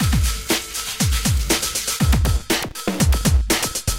Thank you, enjoy
beats, drum-loop, drums